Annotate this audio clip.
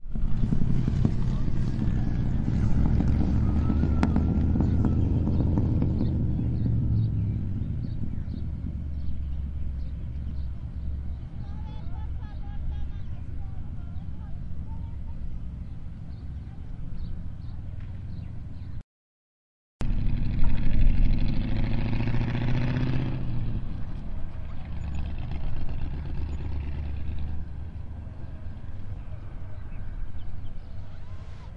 dune buggys nearby semidistant distant engine revs and pop